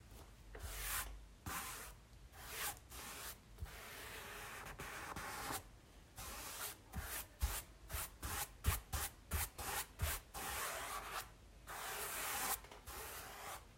Brush Strokes on a Canvas
This sound was created by using a normal paintbrush to paint on an empty canvas. This sound can also be used as a sponge that is busy cleaning surface.
brush, brushes, fabric, OWI, painting, sound-effects, swish